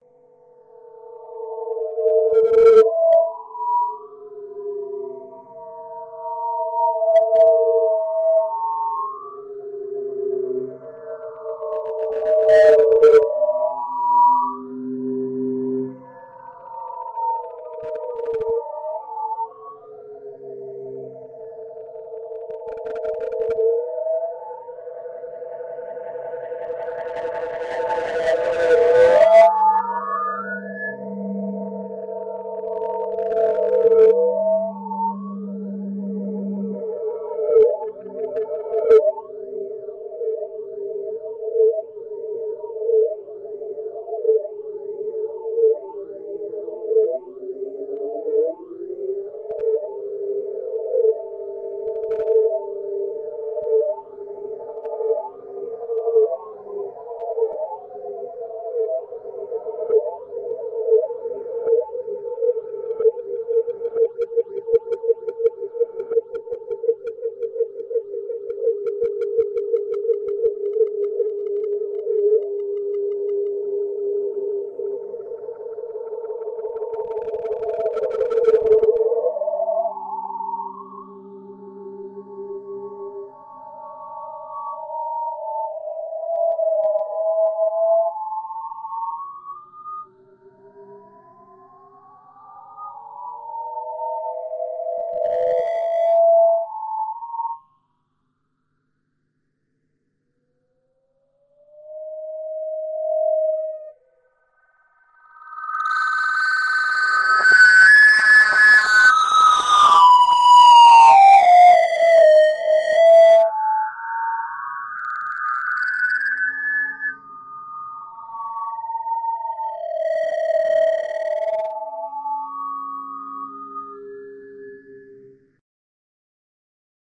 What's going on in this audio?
processed-howling
A howling noise. Several sound processors were inserted in signal loop.